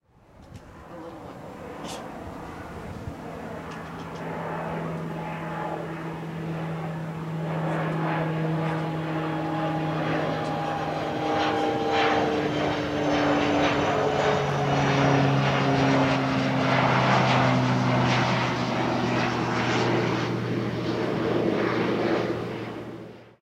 Twin engine plane flies overhead. Not sure what kind, but one ALWAYS flies overhead whenever you're trying to make a recording... ALWAYS.
Shotgun microphone to SONY MD